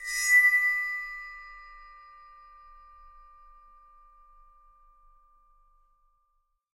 Bowed Bell 01
cymbal cymbals drums one-shot bowed percussion metal drum sample sabian splash ride china crash meinl paiste bell zildjian special hit sound groove beat
china, sound, cymbals, crash, ride, groove, zildjian, hit, special, splash, bell, sabian, bowed, beat, metal, drums, drum, sample, paiste, meinl, percussion, cymbal, one-shot